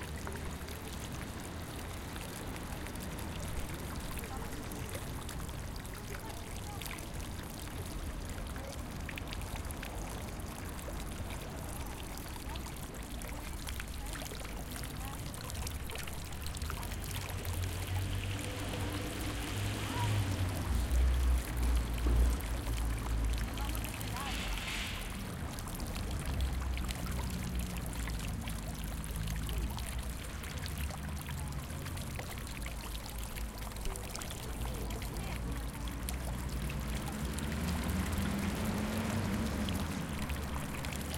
Fountain in city, noise of traffic.
city, traffic, fountain